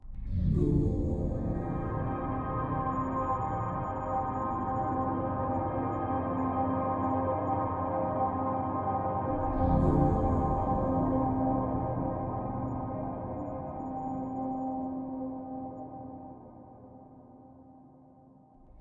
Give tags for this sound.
ambience
choir
church
cinematic
music
pad
processed
religion
synth
voice

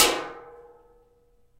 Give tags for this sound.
atonal
metal
percussion

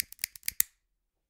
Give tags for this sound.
0
crackle
egoless
lighter
natural
sounds
vol

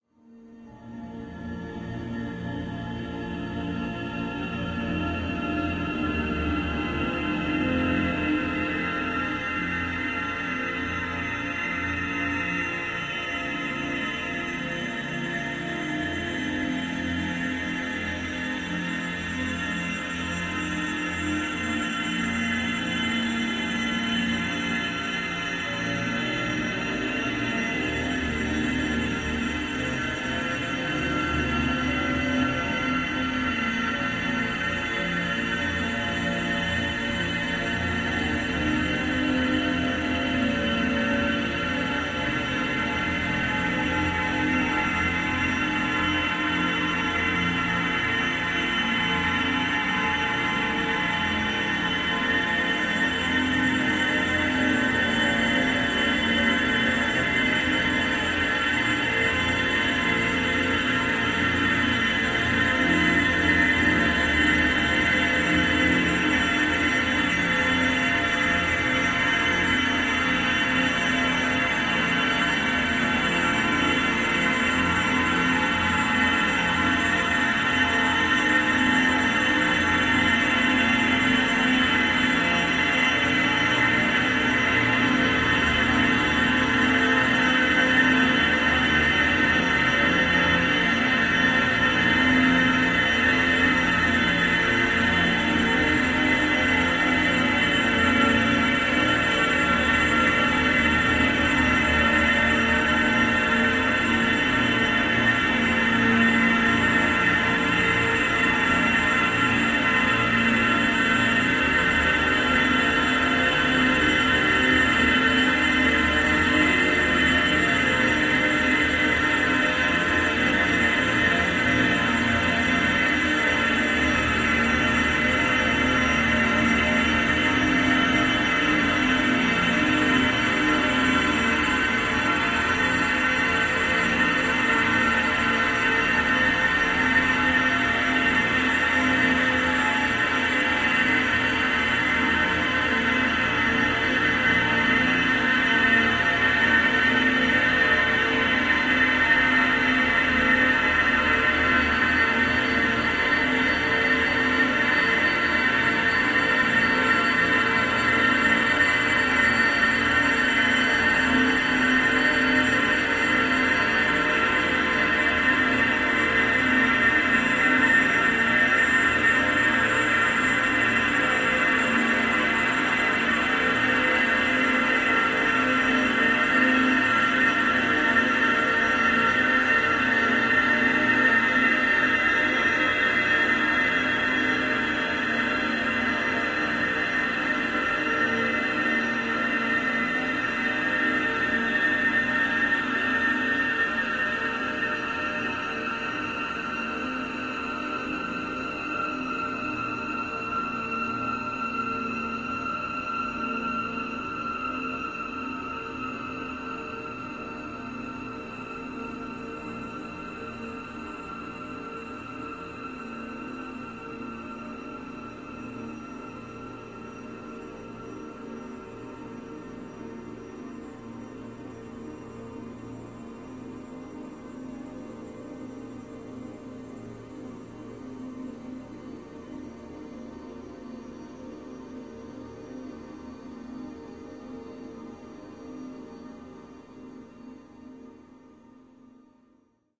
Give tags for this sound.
drone
evolving
experimental
menacing
multisample
pad
soundscape

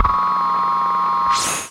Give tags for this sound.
radio
shortwave

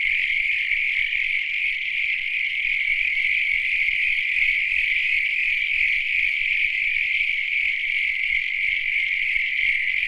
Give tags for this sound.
croaking
frogs-in-dam

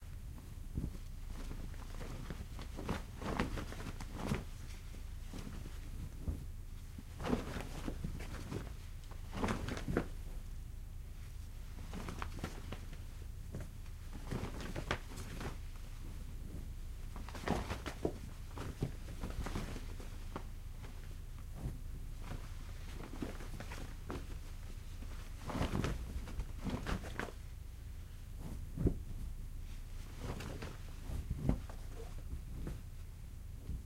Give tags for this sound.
cloth
clothes
clothing
fabric
flag
flap
flapping
material
movement
sheet
tear
tearing
textile

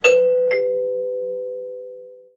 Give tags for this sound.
ding-dong
ding
house
octave
tuned
doorbell
door
chime
bing
dong
bell
c
ring
door-bell
bong
ping

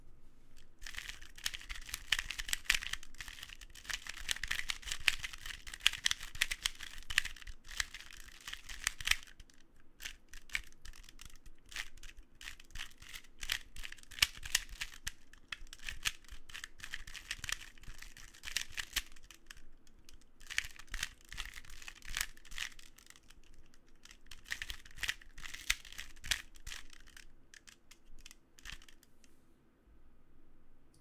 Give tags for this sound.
Rubikscube
Rubiks
Puzzel
Crunch
Click

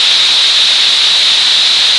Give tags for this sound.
synth
analogue
BP
Eurorack
analog
loop
waveform
filtered
wave
noise
recording
electronic
bandpass
white-noise
modular
synthesizer
generator
short
filter

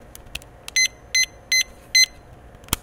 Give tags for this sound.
domestic-sounds
kitchen
cooking
field-recording

